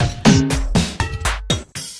All loops in this package 120 BPM DRUMLOOPS are 120 BPM 4/4 and 1 measure long. They were created using Kontakt 4 within Cubase 5 and the drumsamples for the 1000 drums package, supplied on a CDROM with an issue of Computer Music Magazine. Loop 36 is a simple but rather experimental groove.